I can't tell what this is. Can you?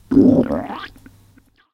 Using an AKG C1000s I recorded my ex's stomach after she'd taken some prescription pills and they'd started making noises in her stomach! Bit weird, but maybe it's just what someone's looking for!
human, weird, stomach, stomach-noises, disgusting